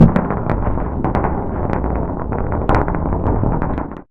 bowling roll-nofadeout
sfx, attack, kugeln, bowling, kegel, effect, roll, kegs, sound, effekt, rollen, ball, rolling, game, video-game, kugel, bawl